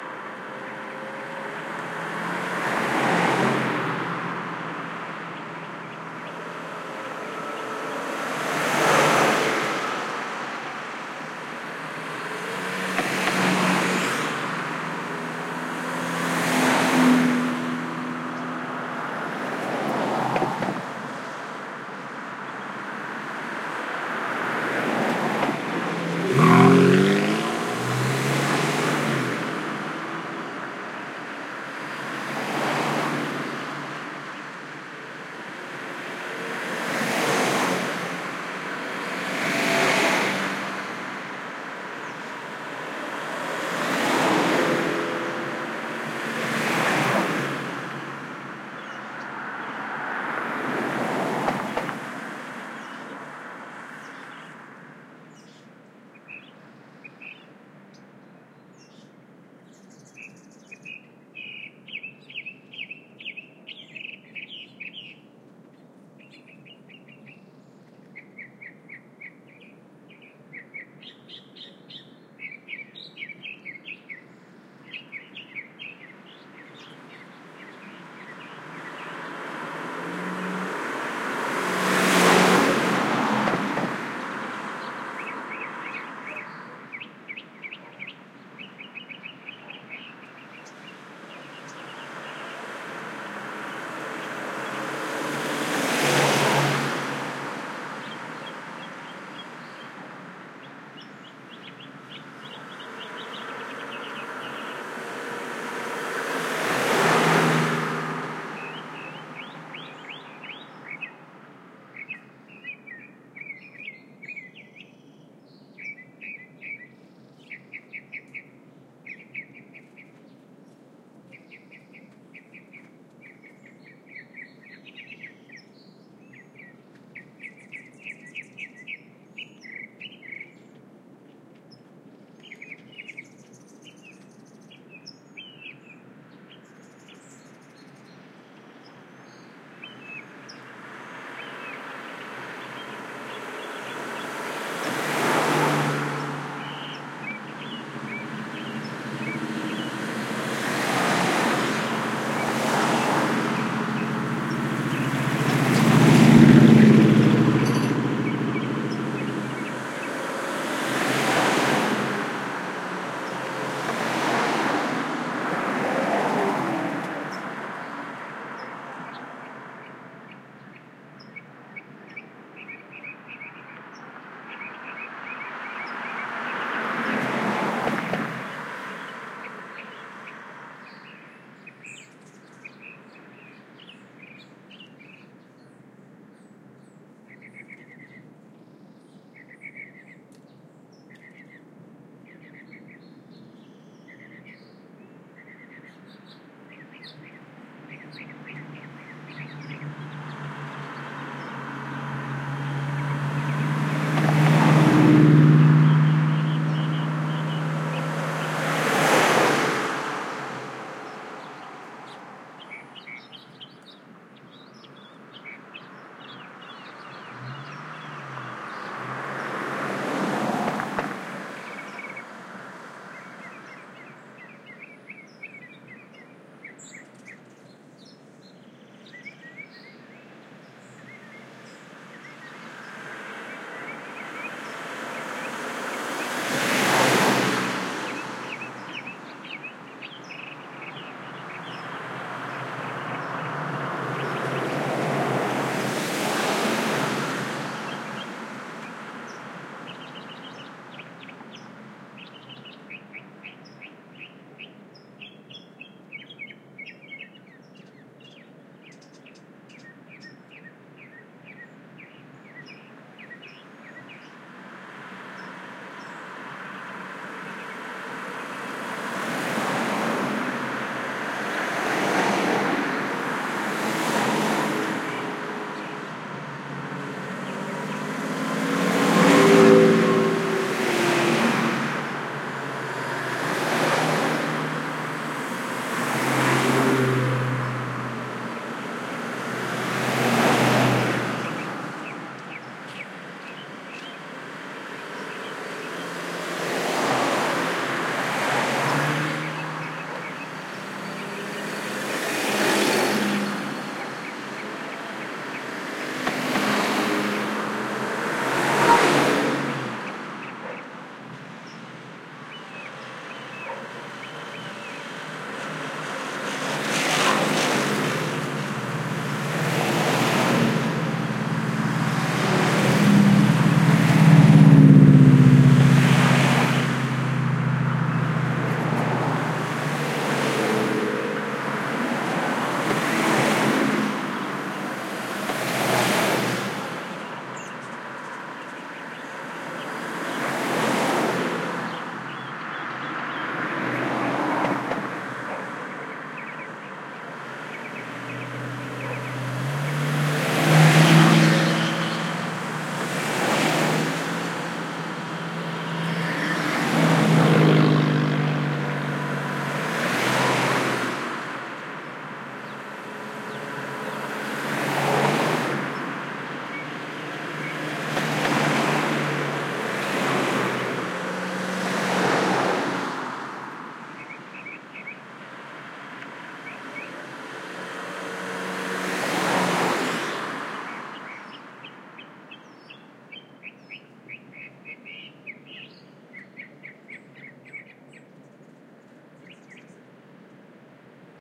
VHC S Traffic Canyon Morning 001
I stopped and recorded Los Angeles canyon traffic on my way to work. Nice selection of engines, cars, etc. Was on a hill, so engines pull harder going one way than the other.
Recorded with: Sound Devices 702t, Beyer Dynamic MC930 mics
city, drive, driving, morning